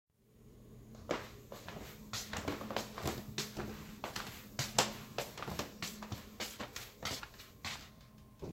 Walking,dragging feet.